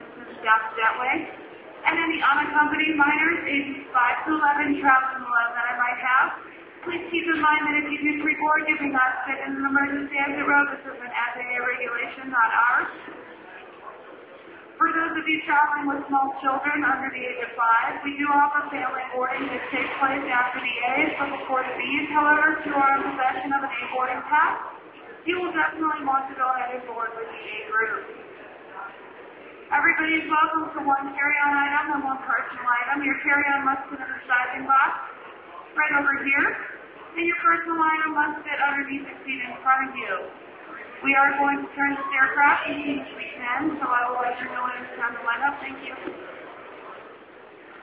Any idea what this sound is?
The sound of announcements being made in an airport.